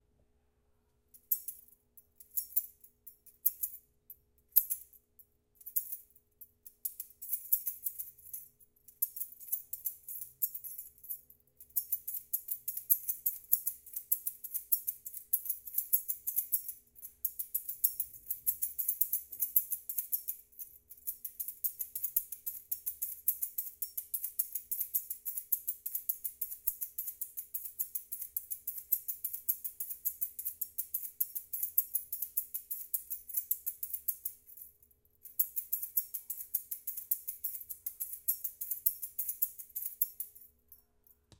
Keys in a key ring used to make samba rhythm.
Recorded with Zoom H5n